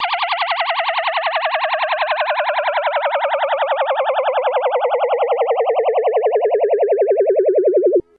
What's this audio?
space ship lands 2
aliens, ship, space